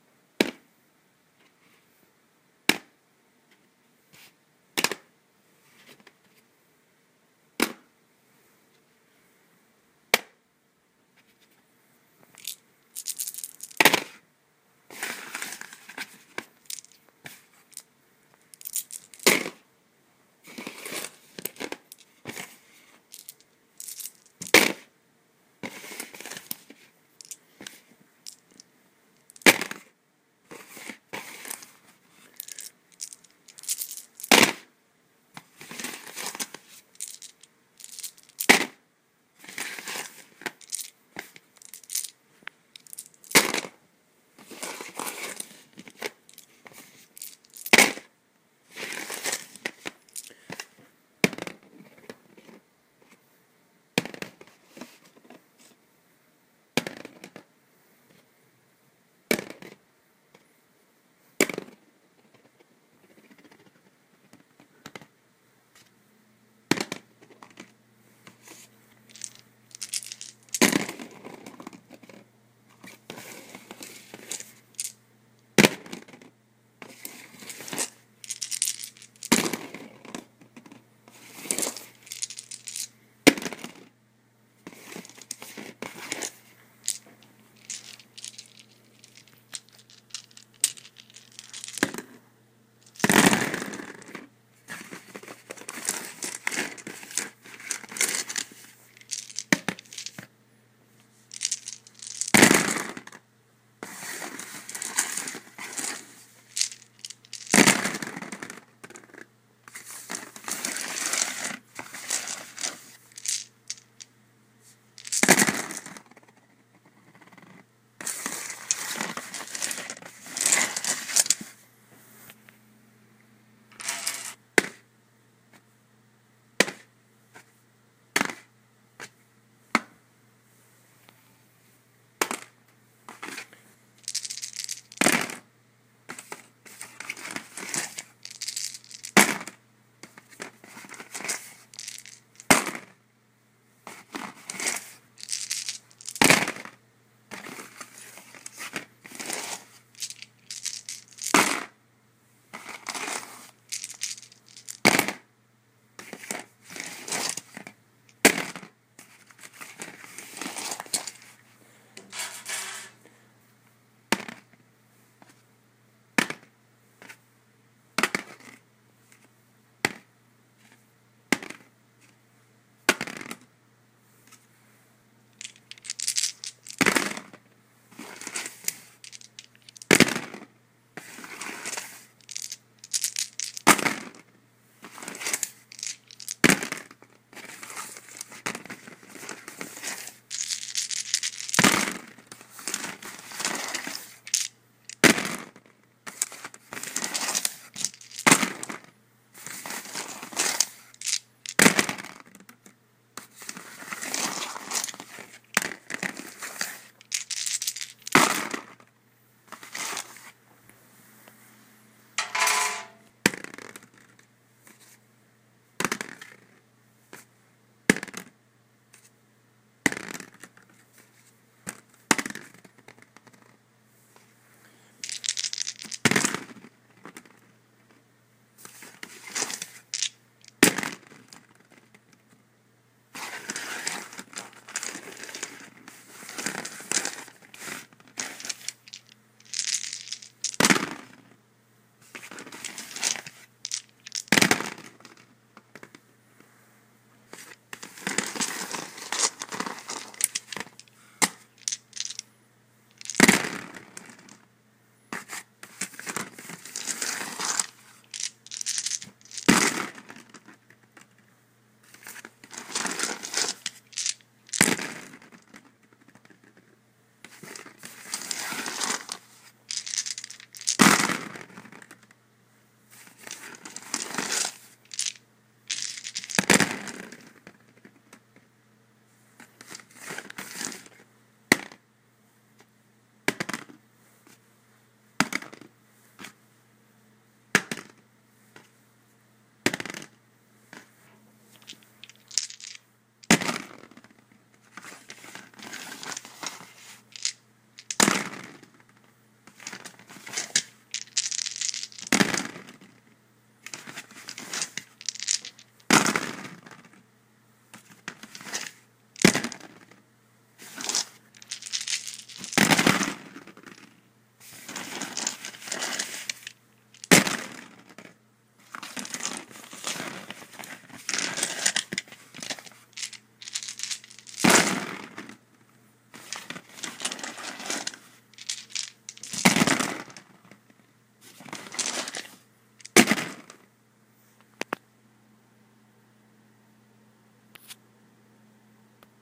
DiceRollingSounds Cardboard
This file contains the sounds of various dice rolling on a cardboard surface. It is less obvious what die type is rolling or the number because of the muting effect of cardboard.
Dice rolling sounds. Number of dice: 1, 5, and 10+ samples. Type of dice: d2 (coin), d4, d6, d8, d10, d12, d20, d100 (two d10's). Rolling surfaces: wood, tile, and glass.
d10, d100, d12, d20, d4, d6, dice, die, game, roll, rolling, rpg, throw, throwing